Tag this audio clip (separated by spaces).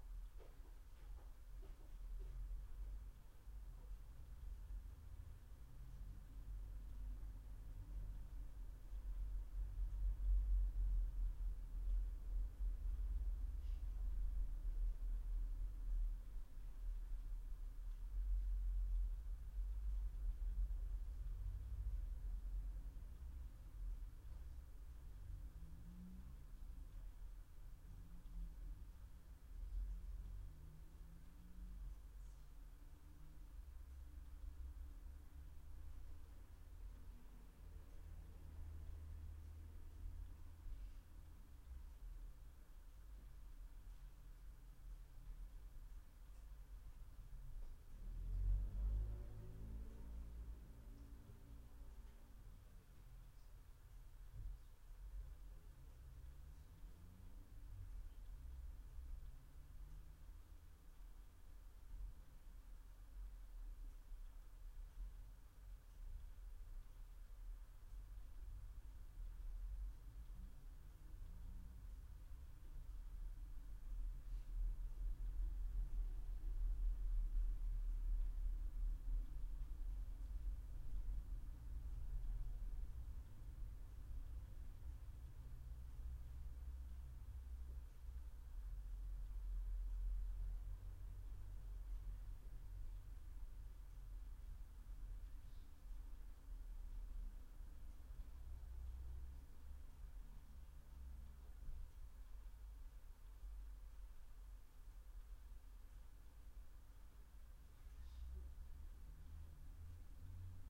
flat parisian near street silence car